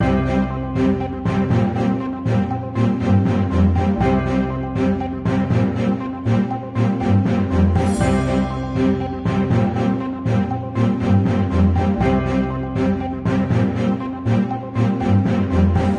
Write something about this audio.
News Background
This is an easy-to-use loop. 120 bpm. Usually used for reading the headlines while having that loop in the background. Real stuff!
loop, news, radio, jingle, outro, signature, intro, tv